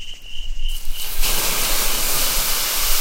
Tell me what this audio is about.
lost maples putting out fire2
fire, forest, hill-country, hiss, insects, smoke